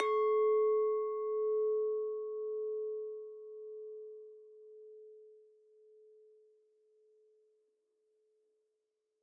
Just listen to the beautiful pure sounds of those glasses :3
edel, glassy, glass, crystal, soft, clink, wein, wineglass, weinglas, glas, pure, wine